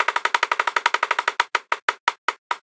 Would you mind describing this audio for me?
Similar to a wheel from a game show slowing down
This sound is remixed from: mialena24